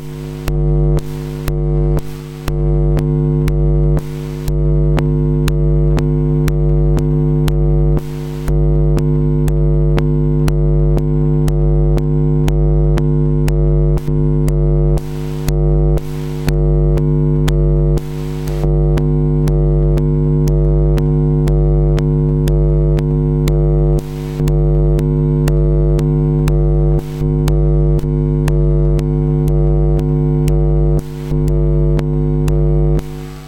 EM magnetic valve04
electromagnetic scan of a magnetic valve opening and closing in a 4 step pattern with interruptions through noise. sounds a lot like synthesized sound.